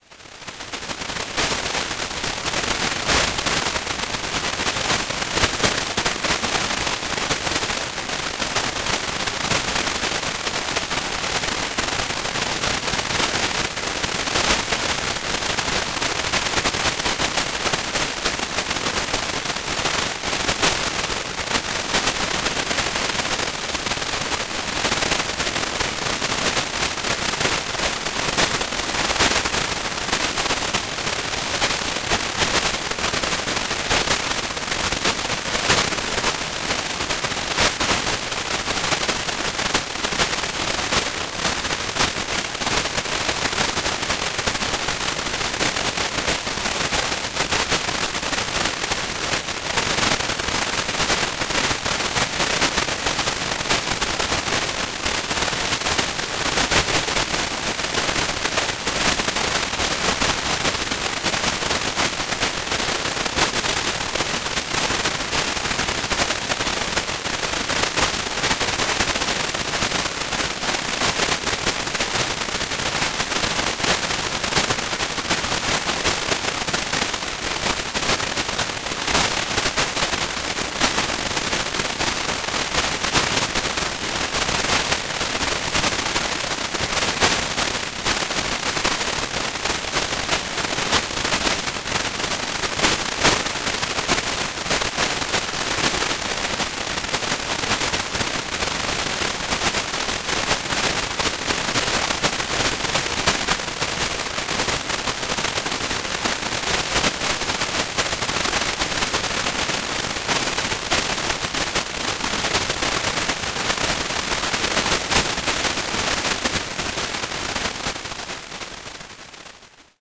Noise Garden 10
1.This sample is part of the "Noise Garden" sample pack. 2 minutes of pure ambient droning noisescape. Industrial noise mess..
reaktor, effect, drone, electronic, soundscape, noise